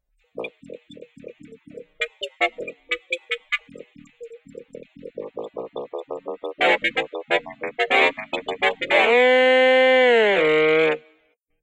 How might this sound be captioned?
1115 tombola cracklebox clicking timestretch
I took Tombola's recording of a cracklebox clicking and emitting a kazoo-like sound at the end. Using Audacity, I transformed it by stretching the time by -70%.